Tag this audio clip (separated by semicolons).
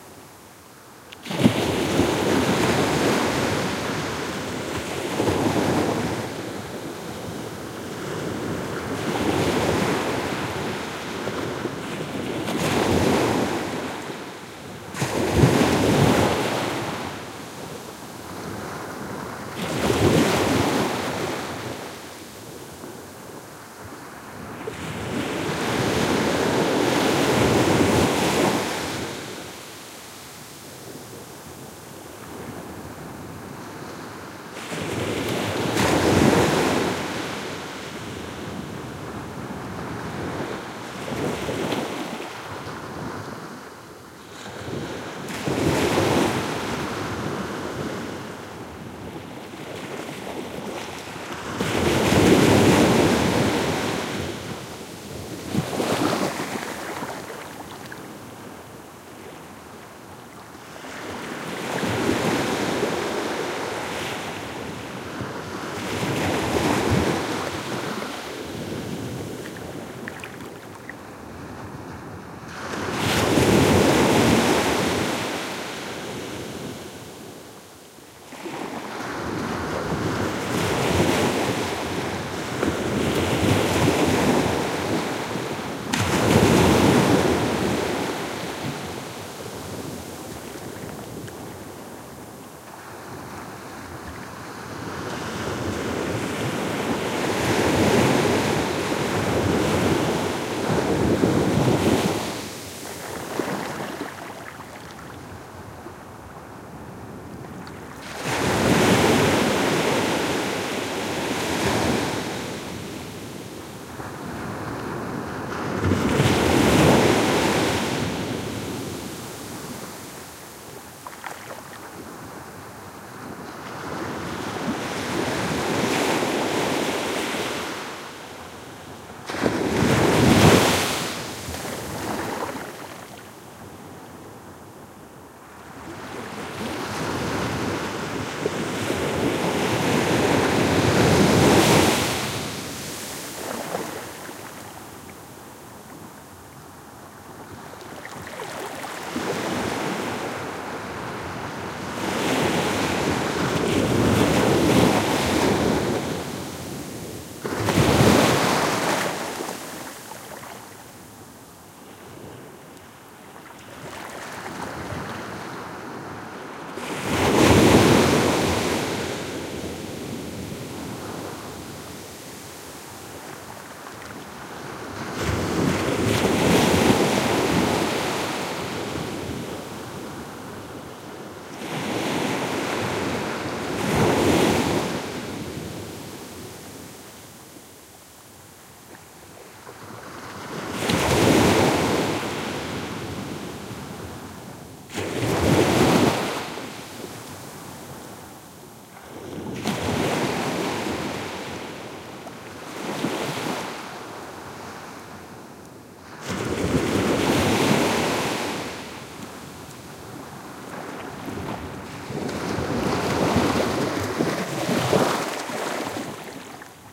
beach; field-recording; Spain; surf; water; waves